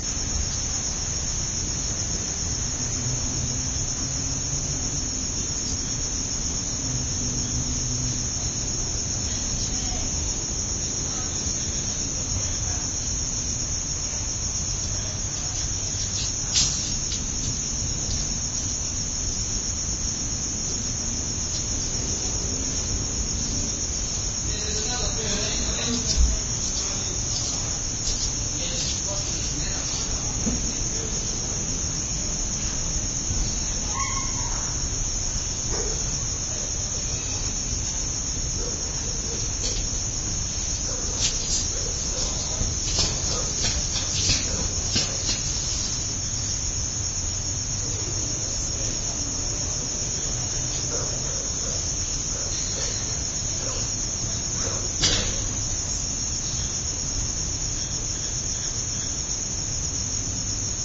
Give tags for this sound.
binaural environmental suburb night

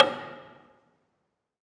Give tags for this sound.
fx field-recording industrial

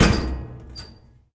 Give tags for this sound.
clothing dryer fx laundry washer

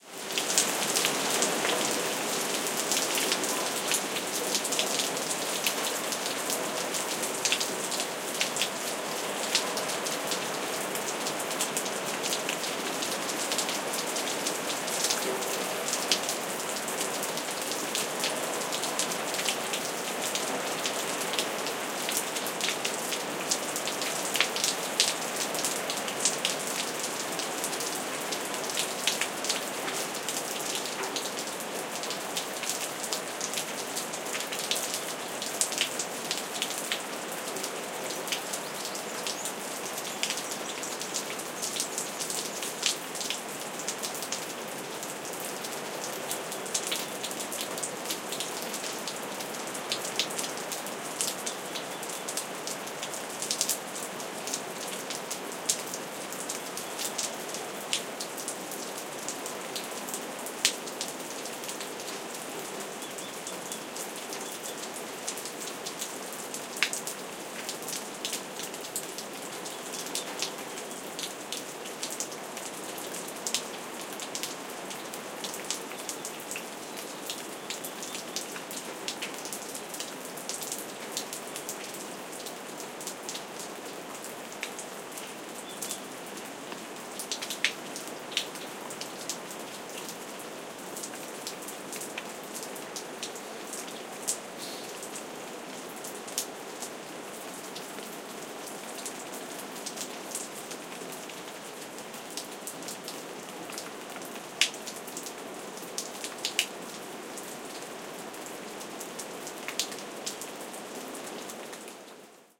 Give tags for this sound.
ambiance field-recording nature rain storm water weather wind

